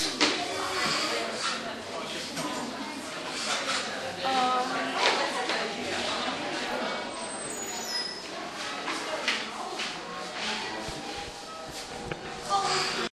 washington americanhistory oldgloryin
Inside the Star Spangled Banner exhibit at the American History Museum on the National Mall in Washington DC recorded with DS-40 and edited in Wavosaur.
field-recording, museum, road-trip, summer, travel, vacation, washington-dc